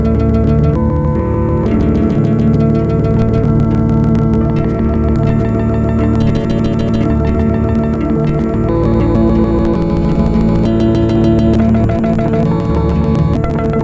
pd guitare
guitar in pure data. contain glitch and doesn't loop well!